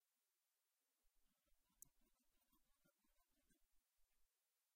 trying to open locked door
Just as the name implies, the microphone is put on the handle of the door as someone tries to open it.
Faint
Tascam